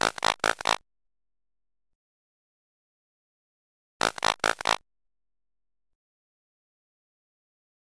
A short electronic noise loosely based on a frog croaking in the yard.
toad, electronic, ambience, evening, noise, water, pond, morning, ambient, croak, frogs